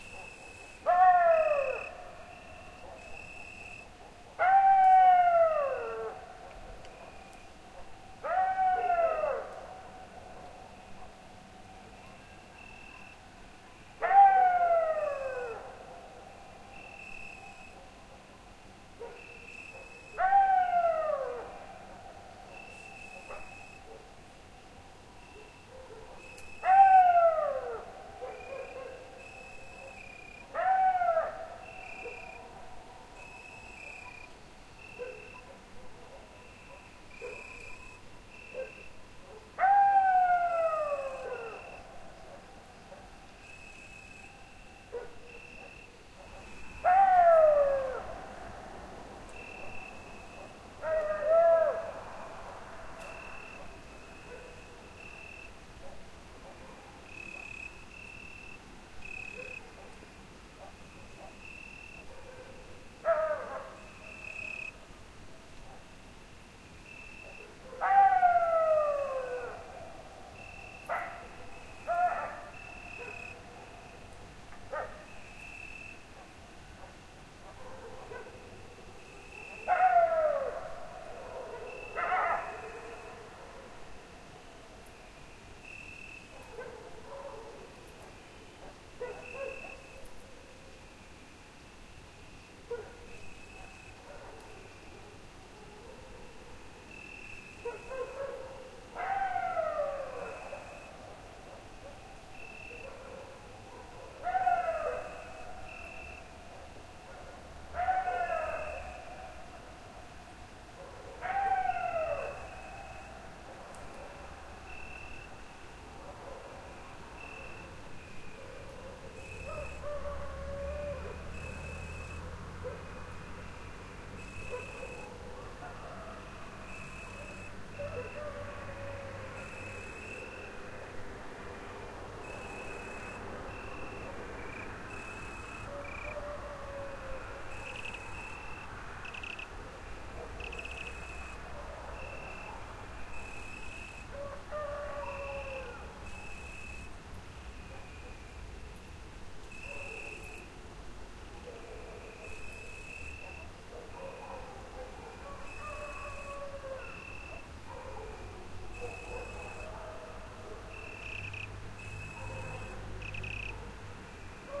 Coyote -crickets & dogs in a pine forest mid night
A late night ambiance of coyotes , dogs and crickets in a pine forest in Lebanon. Recorded with Rode nt4 stereo mic.
ambiance, ambience, barking, coyote, cricket, dog, forest, howling, lebanon, mystic, nature, night, pine, wolf